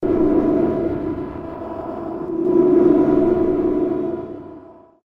sound-design that results in a dark swelling drone that could be used
to create an octave of various samples; made with Adobe Audition